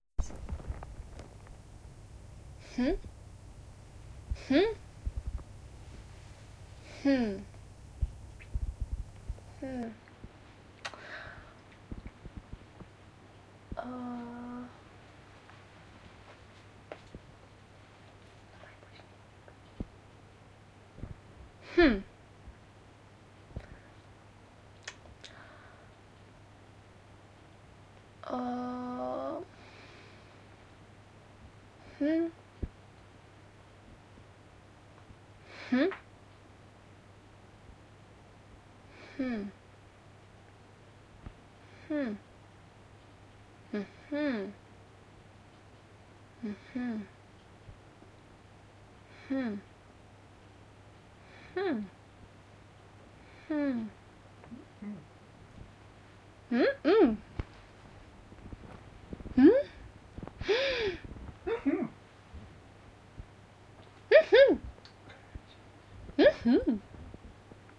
Female voice - cartoon - huh / question / aham
Repeated muttering, aha's and hm's and aaaa's, female voice. Recorded with a camera, so it has some noise in the background, but it can be easily edited. Enjoy!